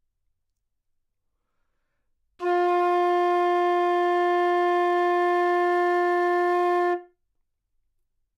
Part of the Good-sounds dataset of monophonic instrumental sounds.
instrument::flute
note::F
octave::4
midi note::53
good-sounds-id::2991
F4, flute, good-sounds, multisample, neumann-U87, single-note